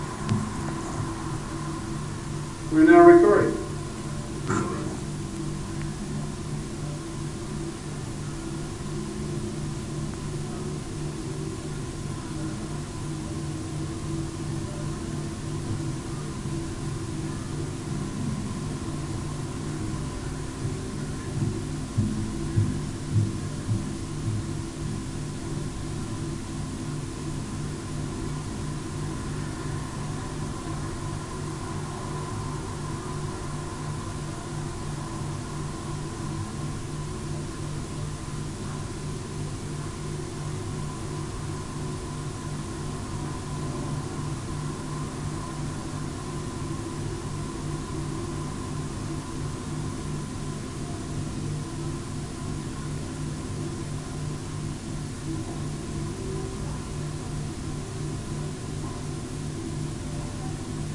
Bronze Stockholm 03 Nude
Recording of a statue of a nude in Stockholm, Sweden. Recorded June 9, 2010 on Sony PCM-D50 using a Schertler DYN-E-SET pickup and normalized in Audacity. There is a clear audible image of footsteps — maybe on a metal staircase? — about midway through.